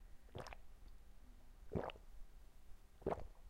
Gulping Water
Effects, Sound